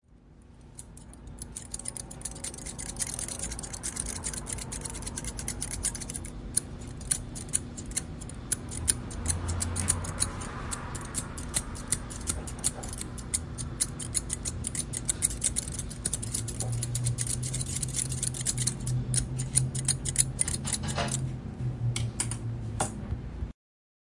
Scissors sound effect.
scissors, sounds, snapping, cutting, Clipping